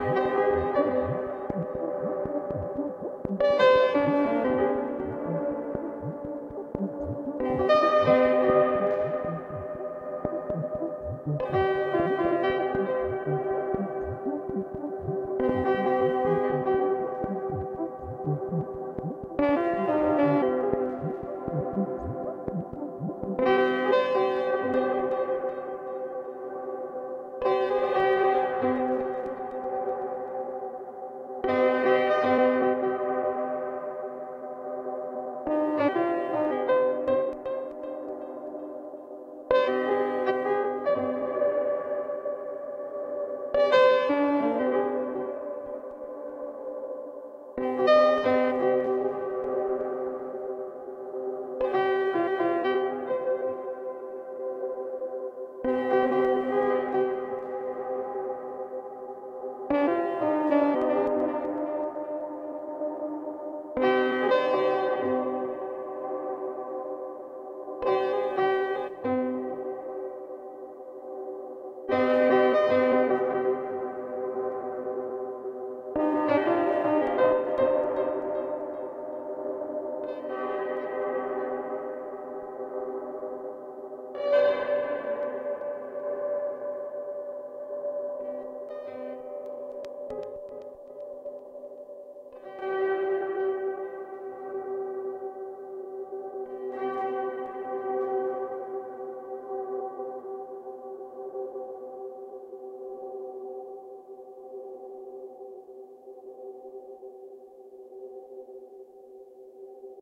Ambient Piano Loop by Peng Punker
Piano Loop processed with Nebulae recorded into Morphagene
make-noise, morphagene, peng-punker, mgreel